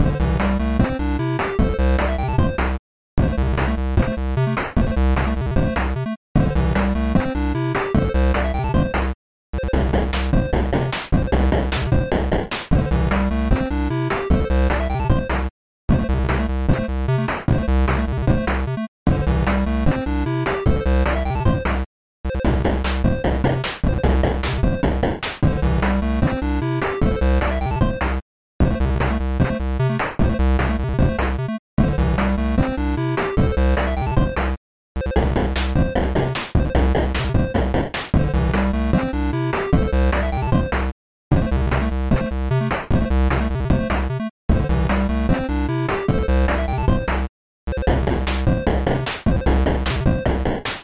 Beep Scale Upgrade
This is just an upgraded version of Beep Scale. It just has a little more complicated percussion, and a few more added notes. I made it using BeepBox.
Thanks!
Complex, Loop, Techno, Beep, Repetitive